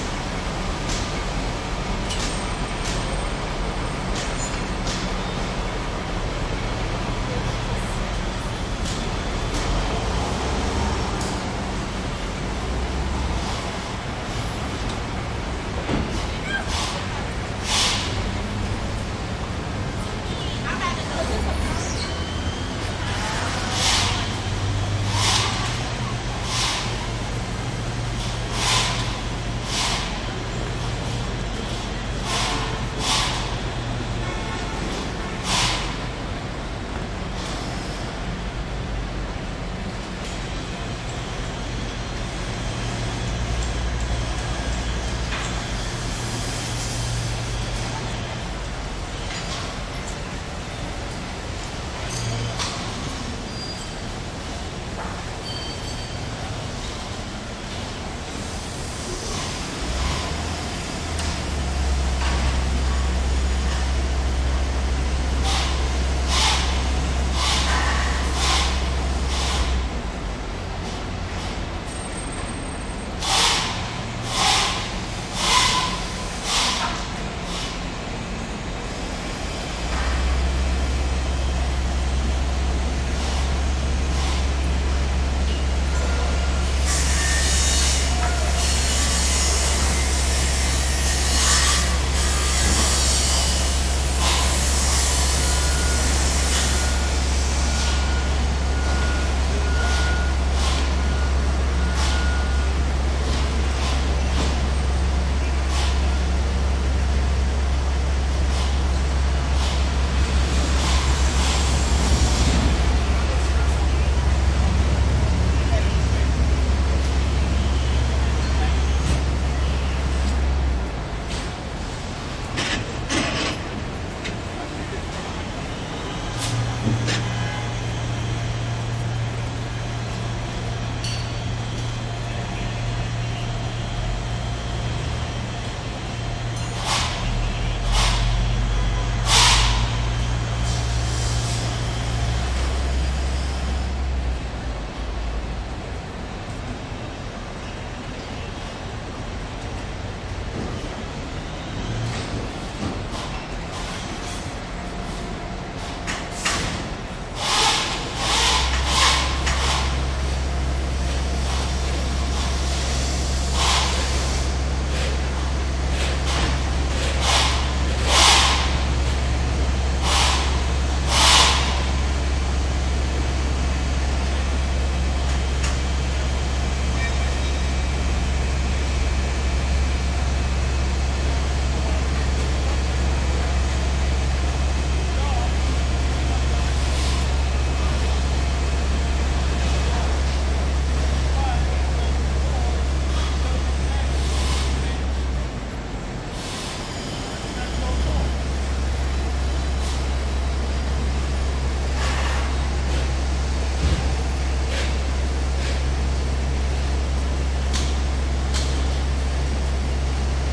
congestion traffic and construction NYC, USA the kind of sound that makes you want to live in the country
traffic, USA, NYC, congestion, construction